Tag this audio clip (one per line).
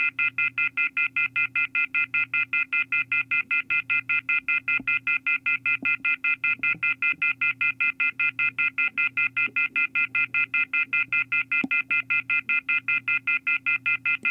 call
wating
loud
phone